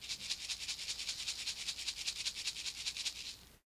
Tape Shaker 13
Lo-fi tape samples at your disposal.
collab-2, Jordan-Mills, lo-fi, lofi, mojomills, shaker, tape, vintage